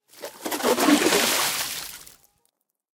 ice drill pull out of hole gushy splash with slush and snow nice detail
gushy, ice, pull, snow, splash, hole, drill, slush, out